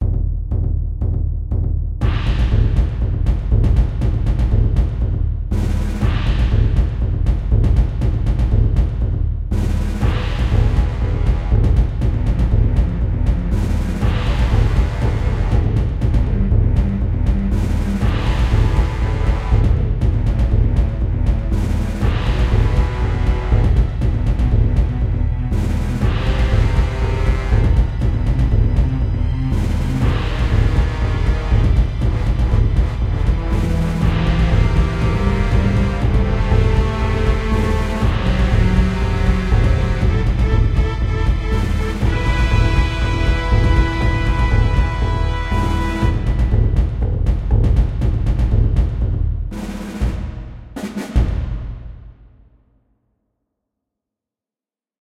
Military tank music
A military song for a game or a film. If you use it please let me now - but you don't have to of course.
movie, orchestral, film, cinematic, strings